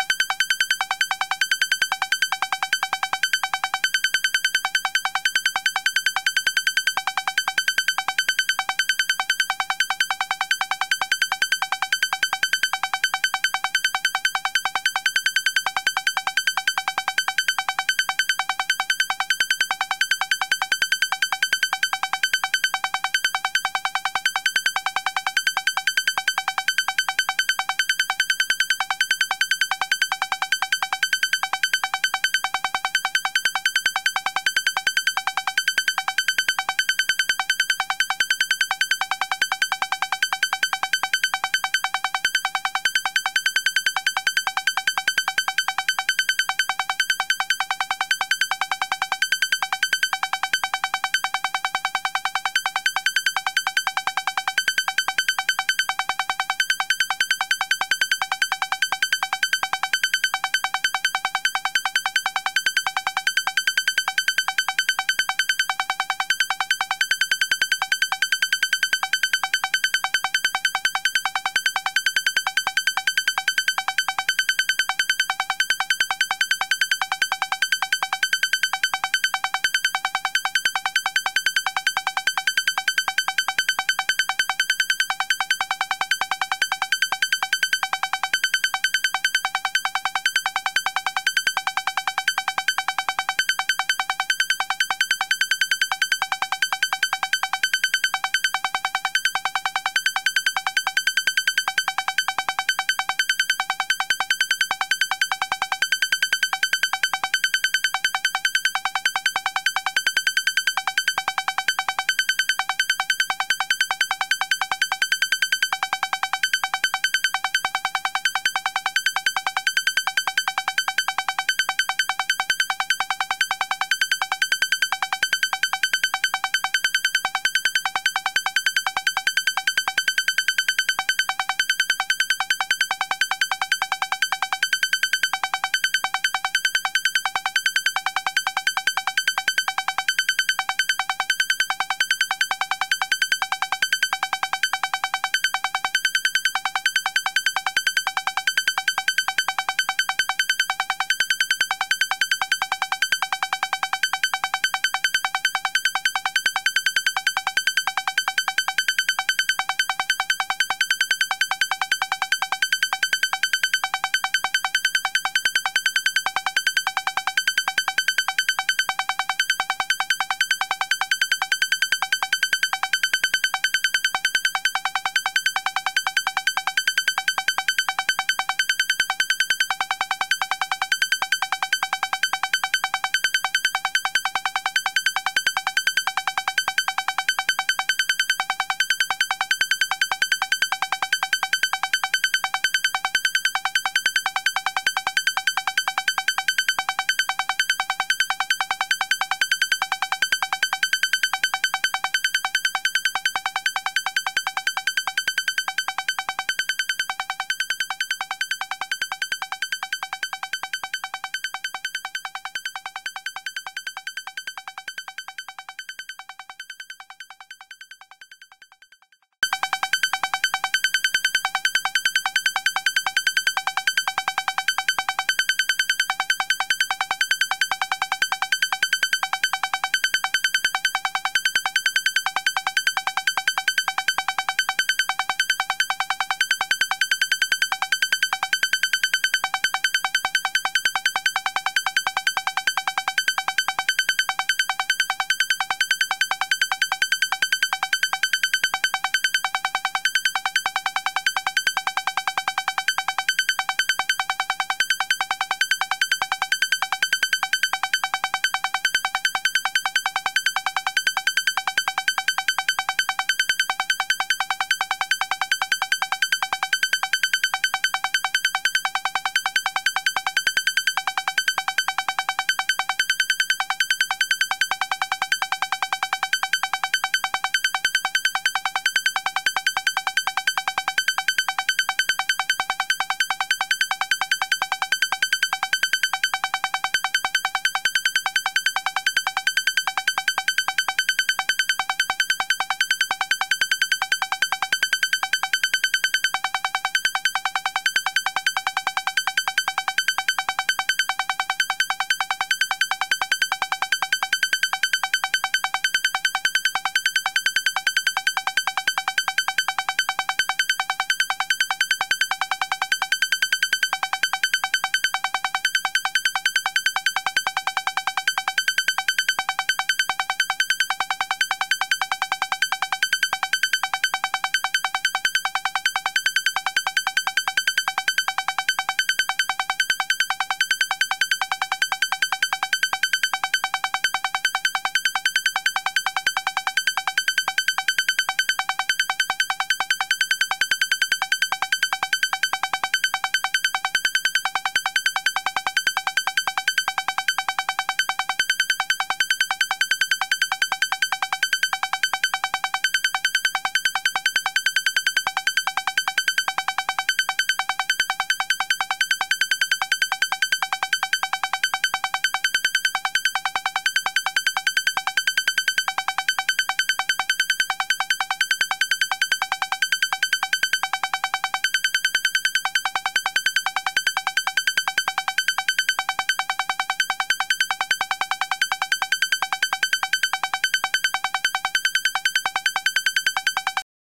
Acid NordLead 3
3, nordlead